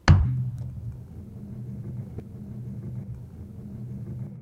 Bowling ball being rolled down an alley. No striking of pins and no diminishment of modulation as ball rolls.